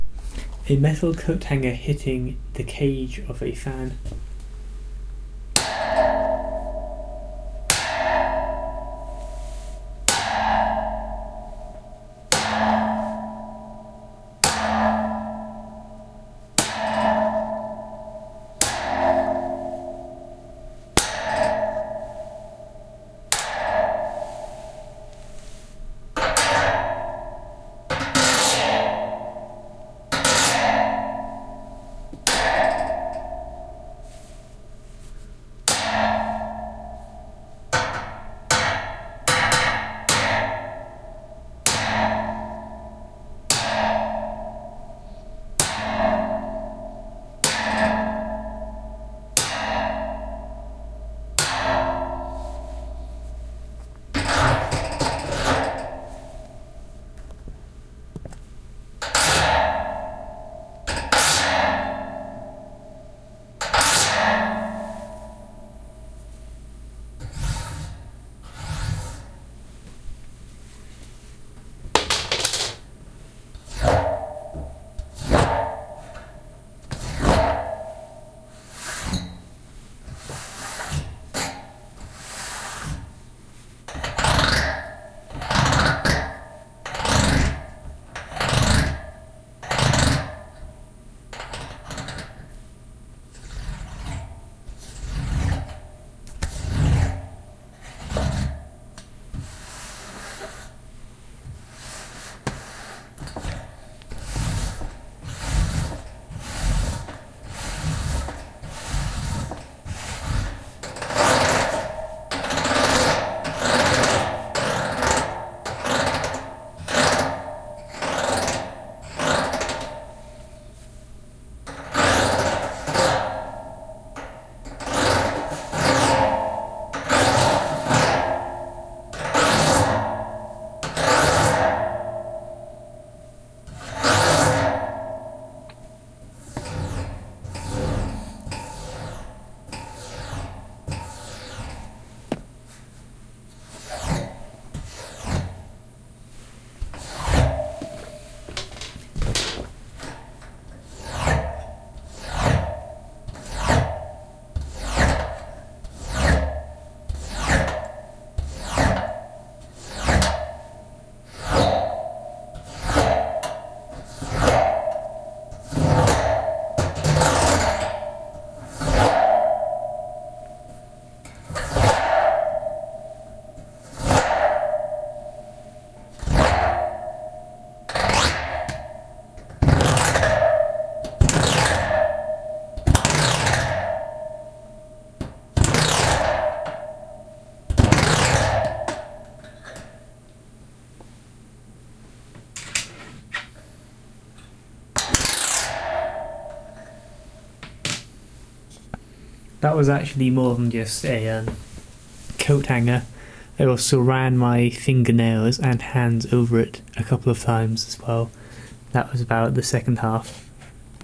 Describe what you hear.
Coathanger + bare hands on metal fan cage
A metal coat hanger striking the cage of a fan
ping, metal, clang, foley, metallic, ringing, dong